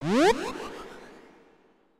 Synth magic power rise buff pitch up
buff, magic, pitch, power, rise, Synth, up